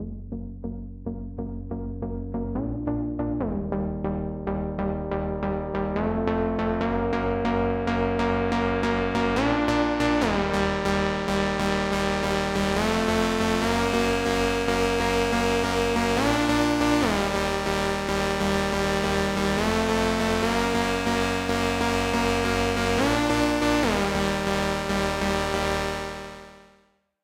In case you wanna build up some intensity before the drop....